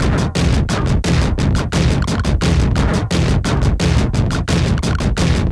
MR MorningTechno 03
Crunchy lofi overdriven analog drum loop, created with old Univox drum machine and FX.,
Lofi, Analog, Odd, Distorted, Jump-Up